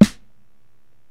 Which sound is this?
Hit harder in the middle instead of putting a jacket over the snare.